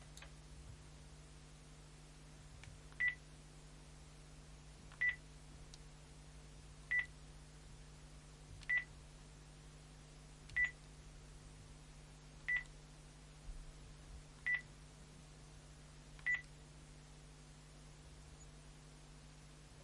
Mobile phone - Dialing tones slow L Close R Distant
Dialing on a mobile phone. Beep tones. Recorded in studio. Unprocessed.
akg, beep, cell, cellular, channel, close, dial, dialing, distant, dual, foley, fostex, mobile, mono, perspective, phone, pov, rode, slow, studio, telephone, tone, unprocessed